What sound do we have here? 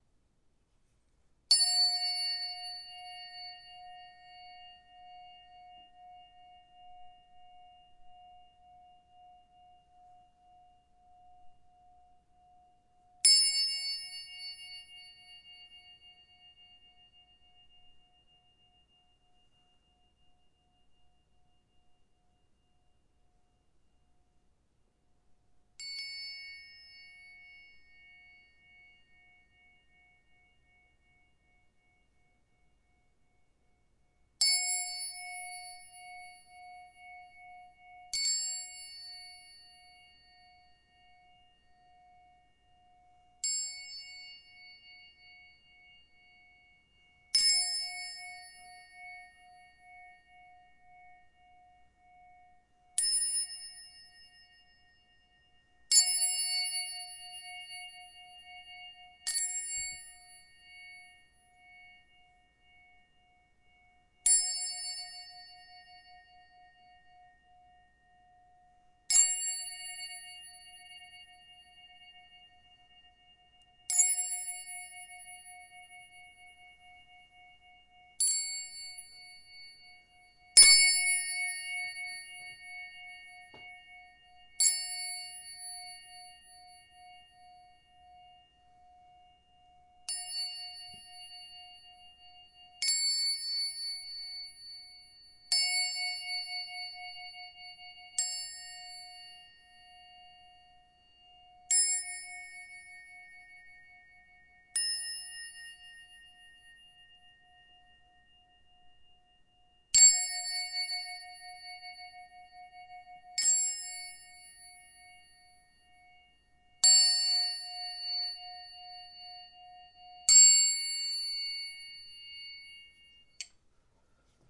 Wrench resonating
Wrench held with dental floss, resonates after being struck, then different makes overtones from being struck again. The floss was mint waxed. Downpitched it sounds like eerie church bells.